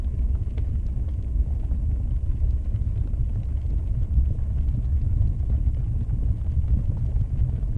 Low Rumbling
My kettle with channel strip